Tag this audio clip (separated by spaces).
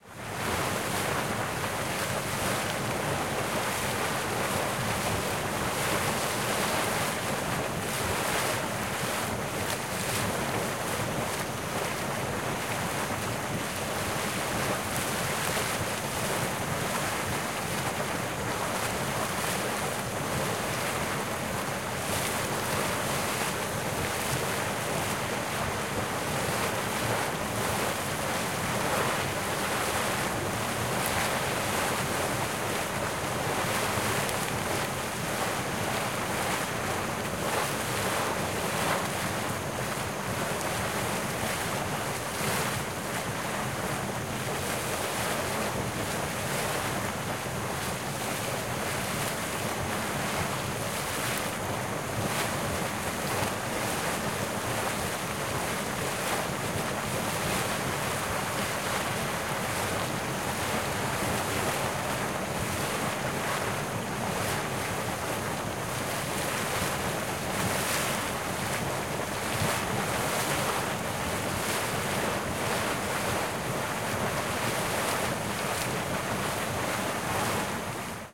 spring water